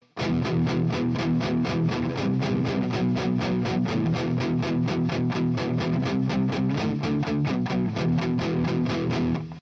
heavy guitar riff 3
Heavy metal riff created using eletric guitar.
This file is 100% free. Use it wherever you want.
chug, heavy-metal